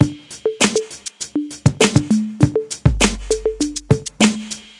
l4dsong loop main
loop, drum, samples, hiphop, rythm, tr808, bass